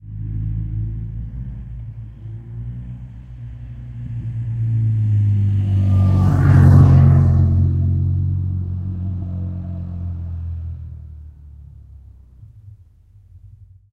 Single Motorcycle Passby
A stereo field-recording of one motorcycle passing by on a tight bend on a narrow country road. Zoom H2 front on-board mics.
motorbike, motorcycle, stereo